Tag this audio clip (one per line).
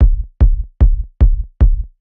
Bass
Sample
Drum
Kick
Beat